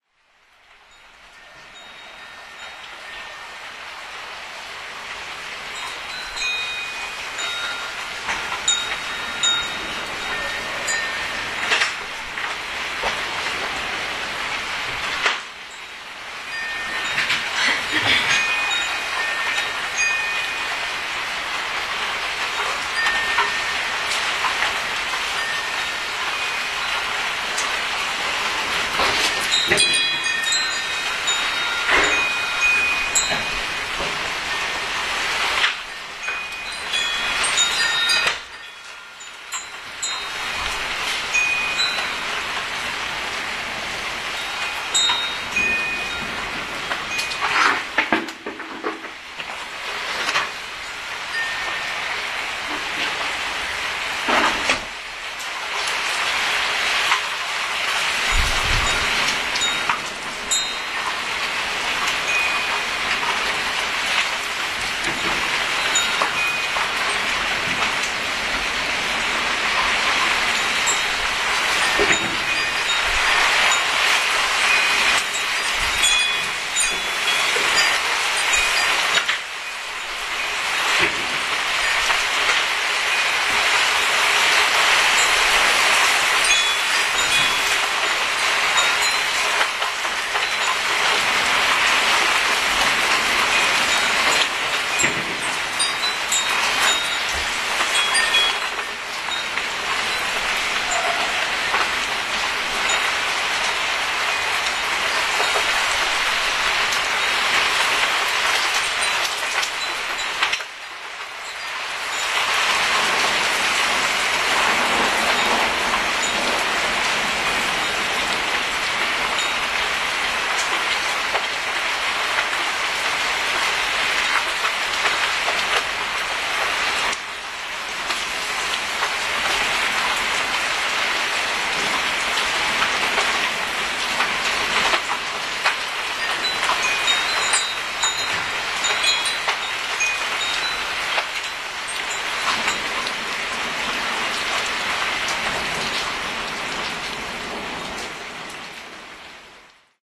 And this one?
rainy balcony1 070610
07.06.2010: about 15.00. the thunderstorm recorded from my balcony (Poznan, Górna Wilda street).
more on:
rain, courtyard, balcony, poznan, tenement, field-recording, noise, poland, thunderstorm, wind